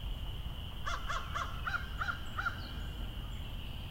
Creek/Marsh ambience throughout.